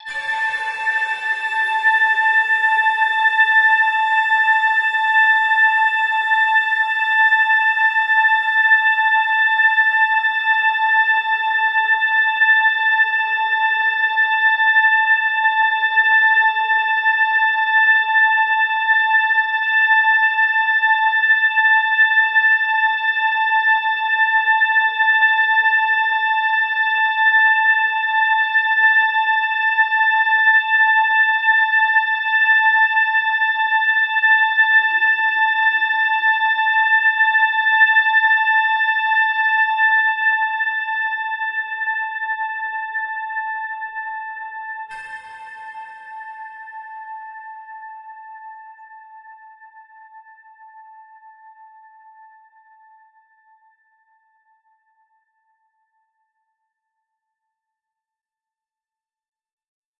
Layers 13 - FRYDAY-81
LAYERS 013 - FRYDAY is an extensive multisample package containing 128 samples. The numbers are equivalent to chromatic key assignment covering a complete MIDI keyboard (128 keys). The sound of FRYDAY is one of a beautiful PAD. Each sample is one minute long and has a noisy attack sound that fades away quite quickly. After that remains a long sustain phase. It was created using NI Kontakt 4 and the lovely Discovery Pro synth (a virtual Nordlead) within Cubase 5 and a lot of convolution (Voxengo's Pristine Space is my favourite) as well as some reverb from u-he: Uhbik-A.